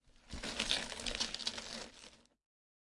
crawling-broken-glass003
Bunch of sounds I made on trying to imitate de sound effects on a (painful) scene of a videogame.
glass, sound-effect